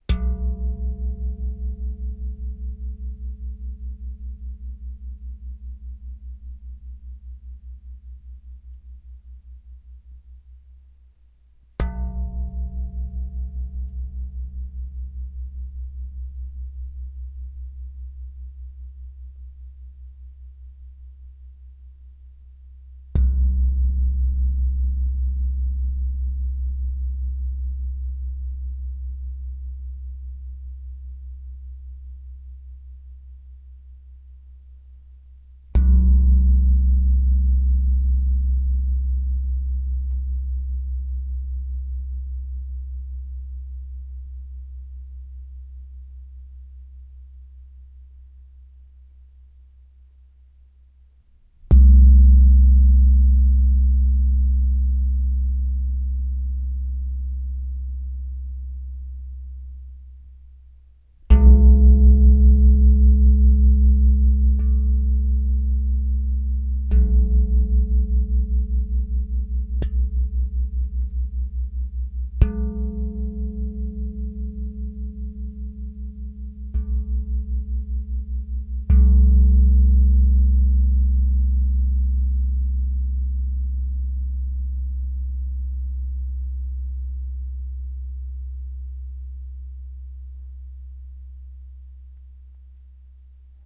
Deep bell
Several hits on a wok pan lid produced these low pitched metallic rings that were interesting to me. I used a soft foam insulation piece to hit the lid and recorded it with a Zoom H5.
bass, bell, deep, gong, hit, low, metal, metallic, percussion